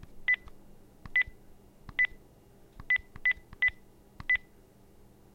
Punching in a number into a phone.